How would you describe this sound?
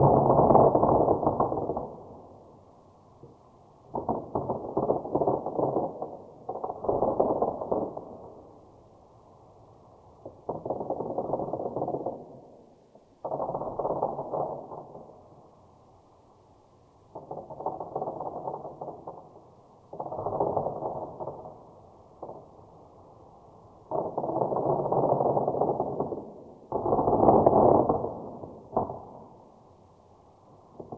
Distant Fireworks
Created a fireworks-like sound with my sampler (out of Guiro sample).
Enjoy
firecrackers, fire-works, fireworks, rockets